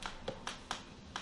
loop007-Squeaks
floor, home, loops, recording, squeaks, stereo